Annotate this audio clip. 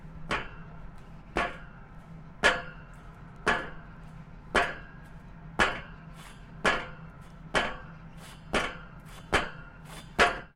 Foot steps on metal